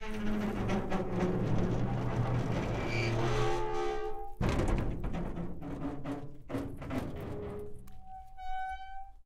metal gate 03
Large metal gate squeaks rattles and bangs.
bangs, gate, large, metal, rattles, squeaks